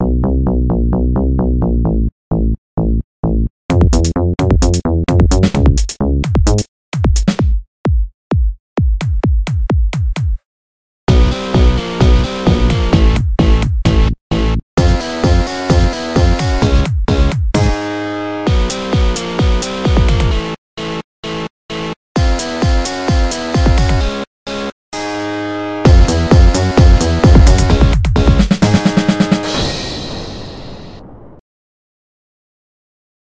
"4 JaTuRuS"
indie rock band in cartoons 3D animation #Blender3D
modeling and video editor from Blender.
music sound from LMMS.
Blender, indies, LMMS